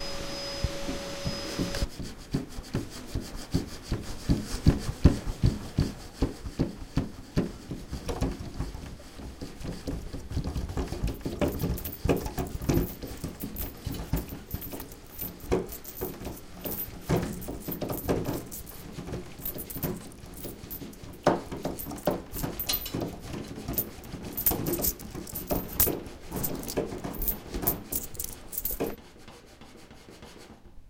Sonic Postcard AMSP Italo Alfonso
SonicPostcard, AusiasMarch, Spain, CityRings, Barcelona